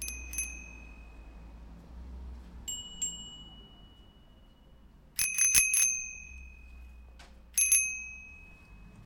Bicycle Bell from BikeKitchen Augsburg 11
Stand-alone ringing of a bicycle bell from the self-help repair shop BikeKitchen in Augsburg, Germany
cycle, bicycle, bell, mechanic, street, bike, traffic